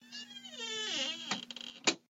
Door-Closing
A squeaky, perhaps spooky wooden door closing
Creak, Creaking, Spooky, Door